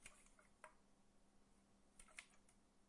This is the sound of a can of Large Monster Energy drink being moved from hand to hand in a posing animation style that's rather quick, no more than four seconds long, I'd say. This is a sound that's perfect for cinematics or for a game's FP animations in an in-hands posture.